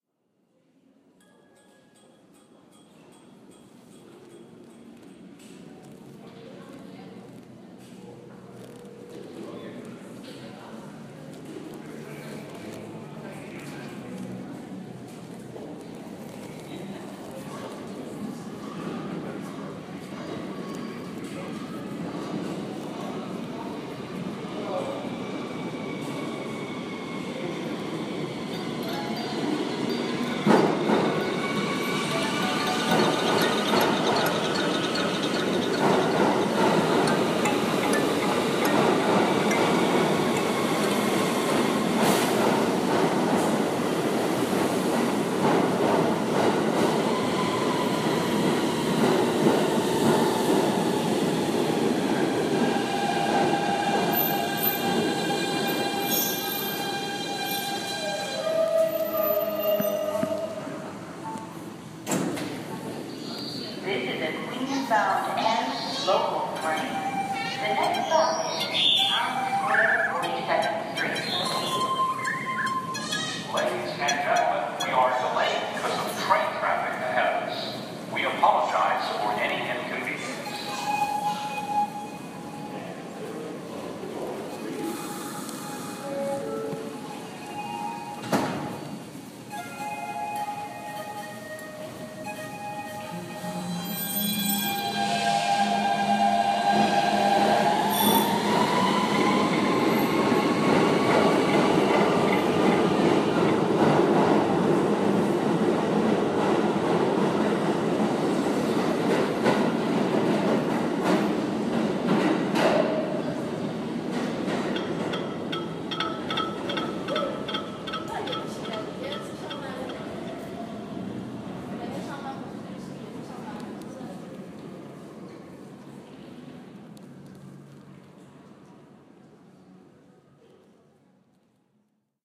34th Street Sound Subway Installation
Sounds of the art installation in 34th Street subway station as trains go past.
new, art, subway, atmosphere, ambient, ambience, field-recording, street, city, soundscape, 34th, trains, york, noise, installation, nyc